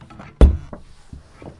records, oneshot, punch, zoom,
punch, records, oneshot, zoom